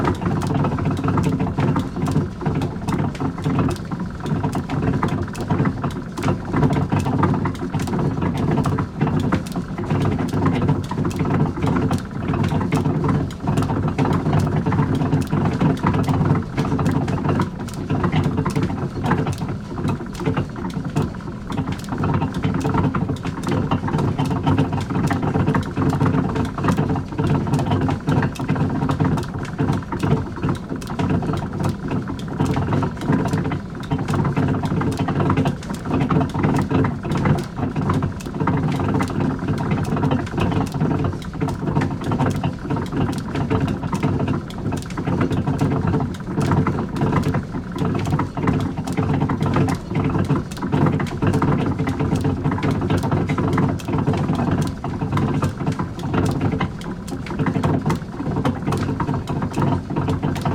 SE MACHINES MILL's mechanism 06
One of the machines in watermill.
rec equipment - MKH 416, Tascam DR-680
machinery, mill